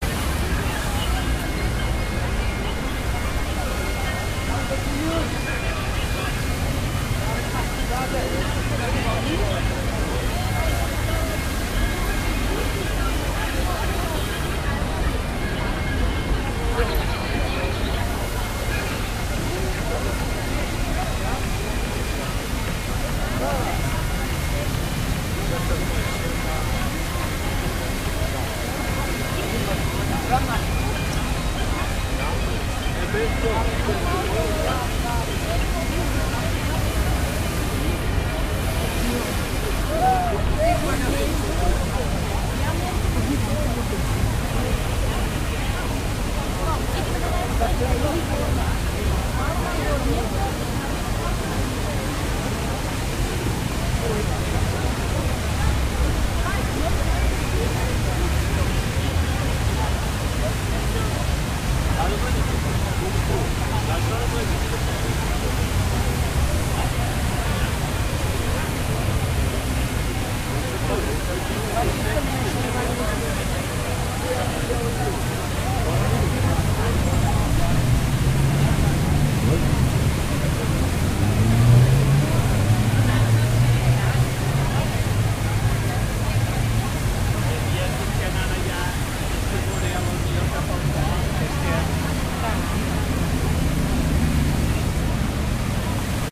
130926-001 Trafalgar Square Central near Fountains
Sept 2013 recording of traffic at Trafalgar Square Central, London.
Part of an architectural student project investigating the city.